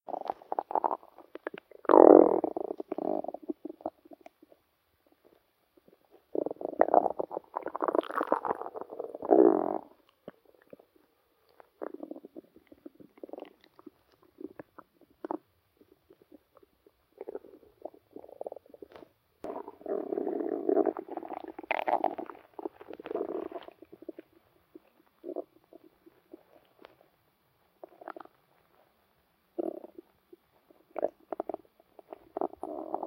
Stomach noises 1
Phone recorded stomach noises, cleaned up using audacity. Loopable & has silence between noises, so it should be pretty easy to cut.
body, vore, guts, stomach, digestion, human